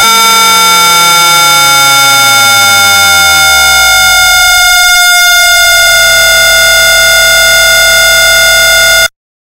Horror Film Beep Sound
A sound effect inspired by the game Slender that I made for my film class. Probably most useful if splicing the sound on and off during a build-up.
Film, Horror, Scary, SFX, Stinger, Thriller